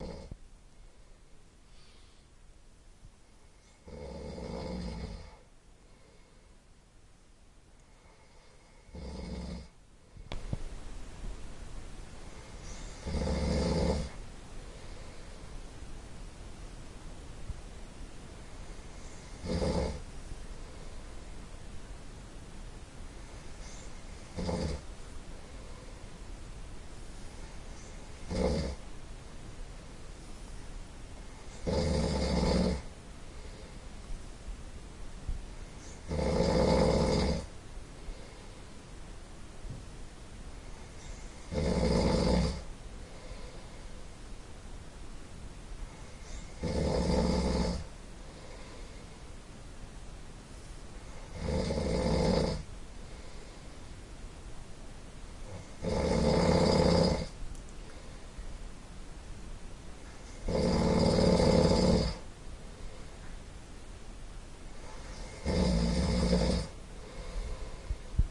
male adult snoring